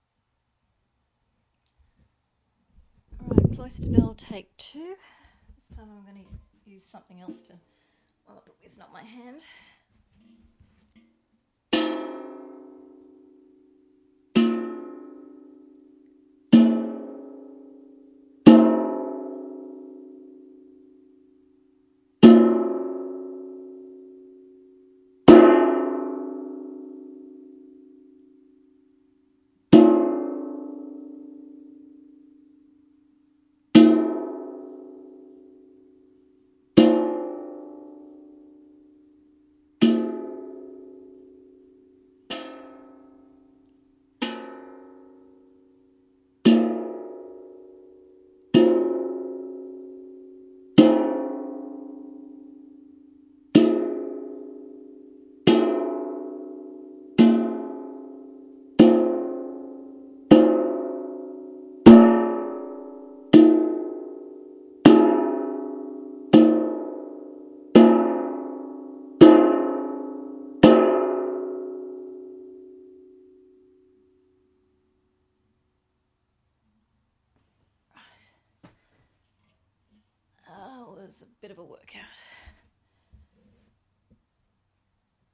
copper pot cloister bell 2 carolyn
You may use this for anything provided it is not graphic or porn! Have fun.
So my big vintage copper jam pot was pressed into service to make a cloister bell sound for the adventures finale. Transformed of course - this is the raw noise recorded in mono and doubled over to stereo on audacity for you to play with.
bell, bong, clang, hit, industrial, metal, metallic, percussion, ring, science-fiction